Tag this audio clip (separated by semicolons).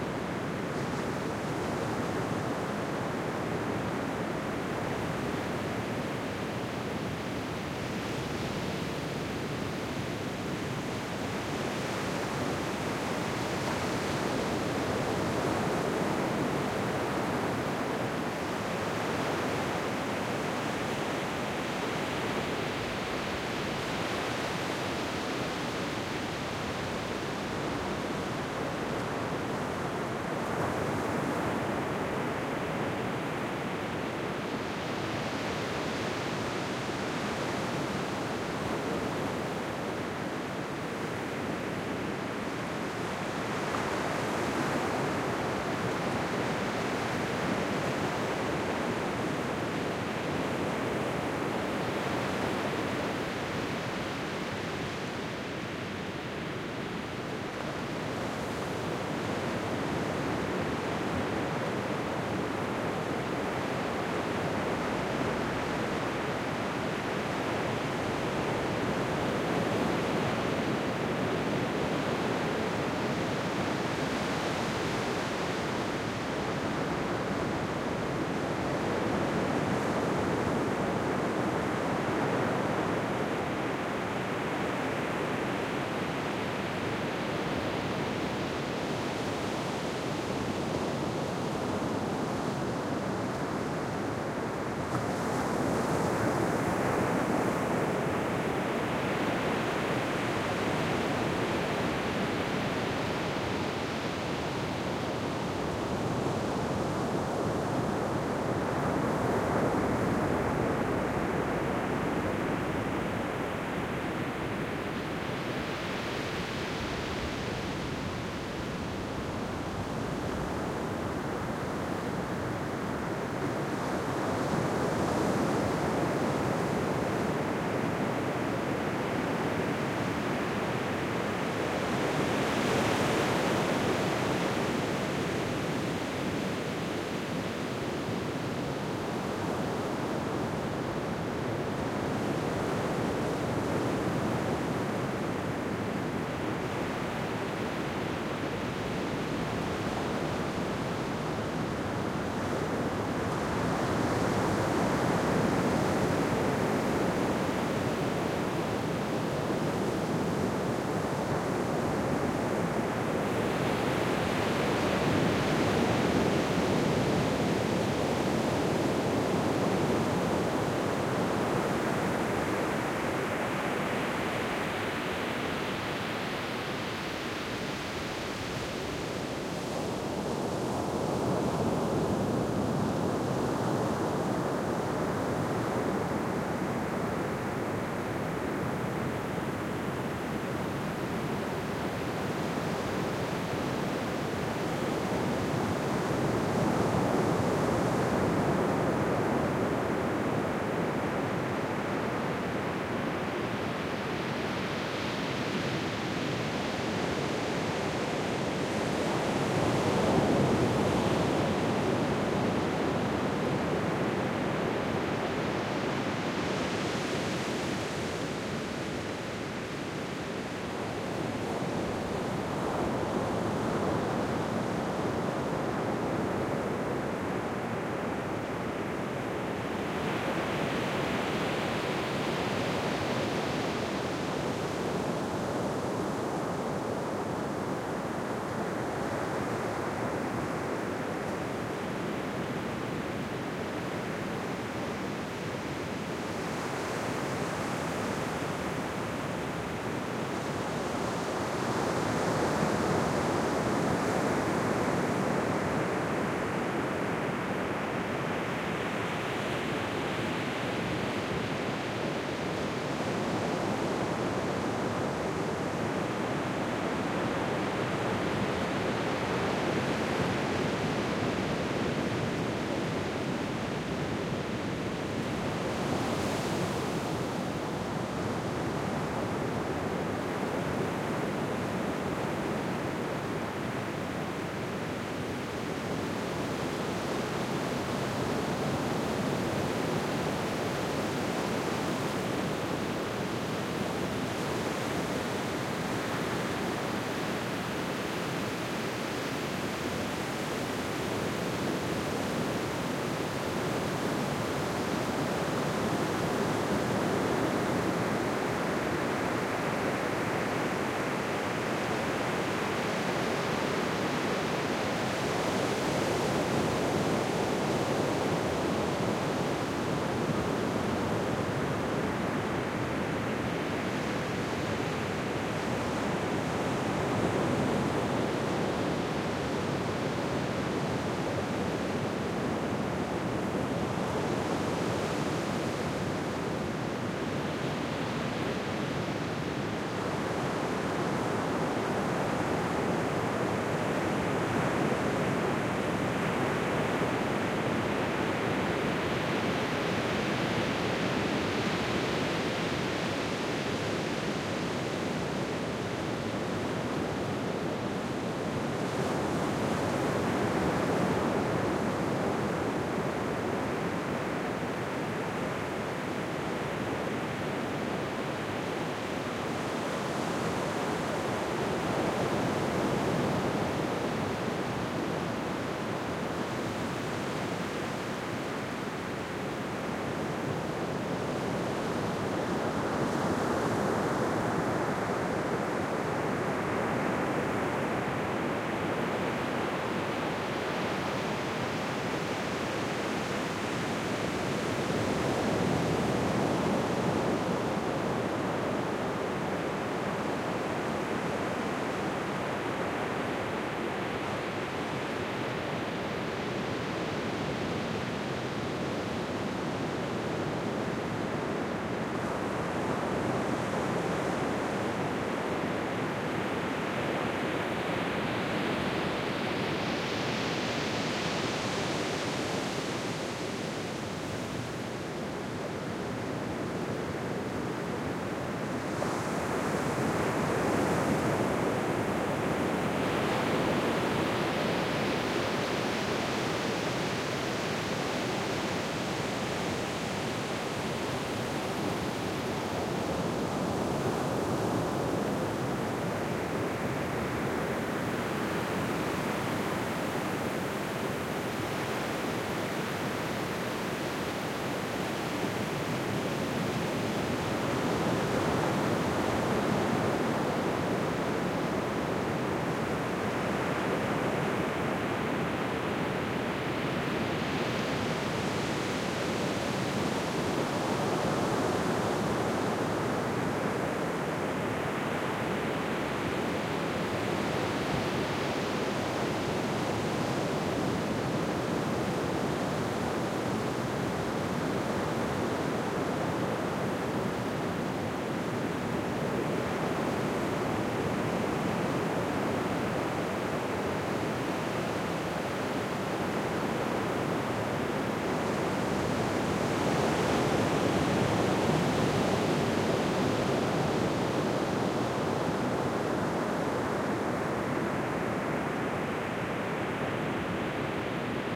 ambient; atmosphere; field-recording; nature; ocean; outside; relaxing; sea; splash; water; waves